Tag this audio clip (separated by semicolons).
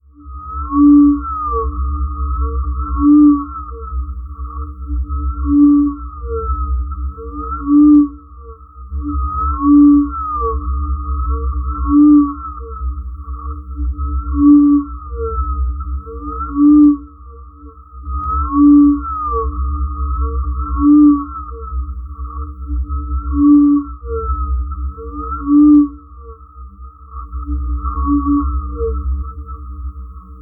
ambiance; background; creepy; disturbing; effect; haunted; horror; scary; sound; terror; weird; whale